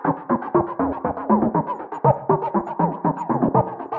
Wierd Loop 007b 120bpm
Loops created by cut / copy / splice sections from sounds on the pack Ableton Live 22-Feb-2014.
These are strange loops at 120 bpm. Hopefully someone will find them useful.